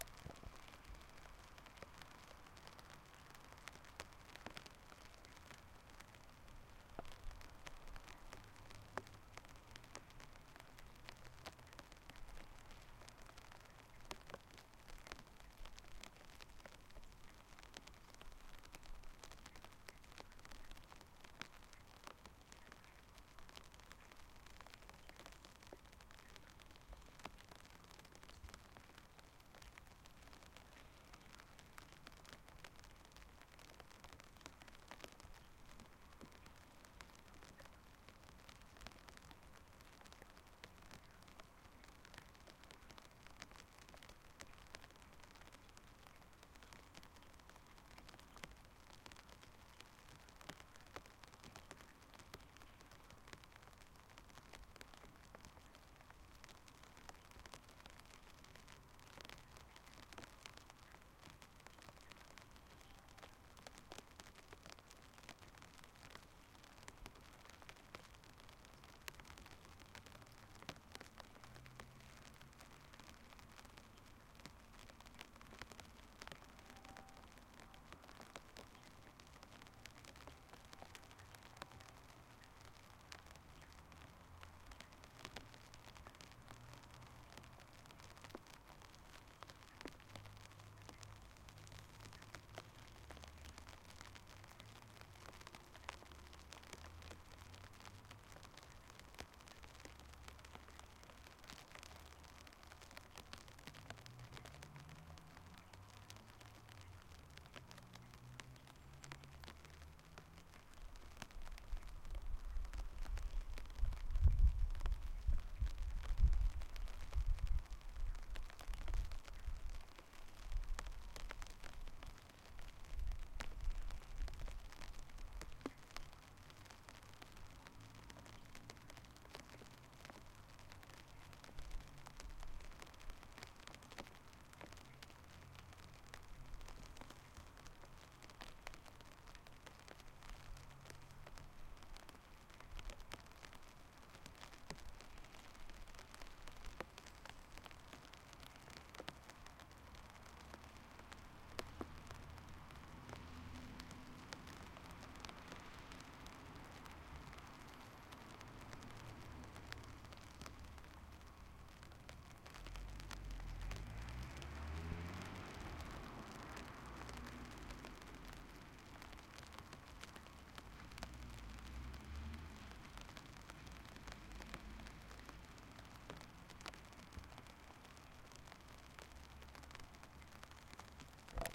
tiny raindrops under an umbrella
Tiny raindrops dripping on an umbrella. Recorded under the umbrella.
raindrops, drops, crackle, fire